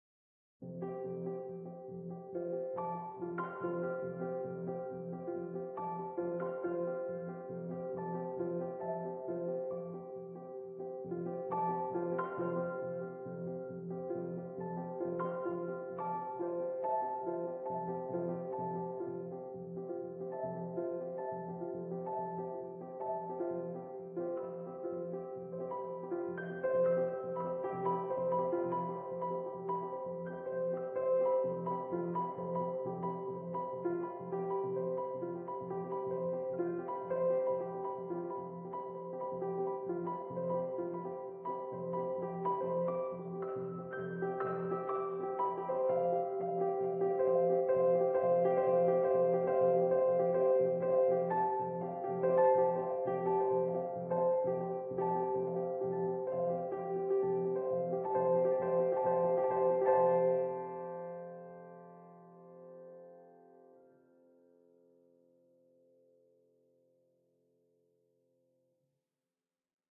Beautiful Minimalist Piano
Beautiful sounding minimalist classical piano piece.
2 pianos laying out a hypnotic interlaying rhythm.
acoustic, beautiful, classical, glass, grand, happy, hypnotic, joy, joyful, minimalist, optimistic, pattern, piano, pulsing, reich, relaxing, repeating, rhythm